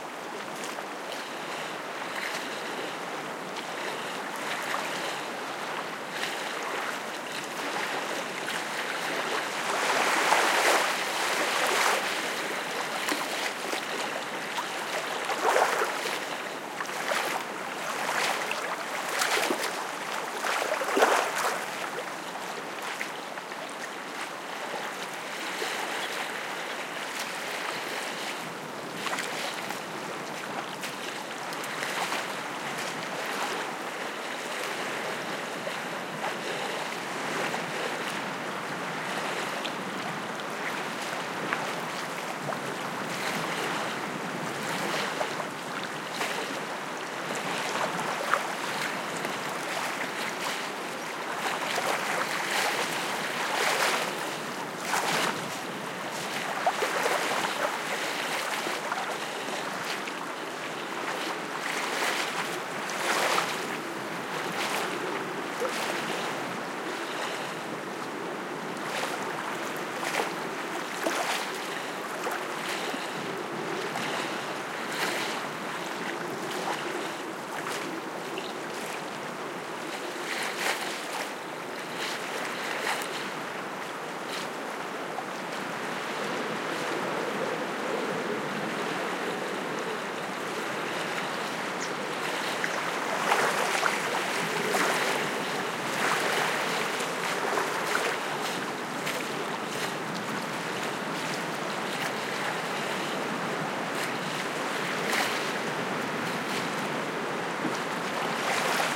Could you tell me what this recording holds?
sound of waves, a windy day in a marshland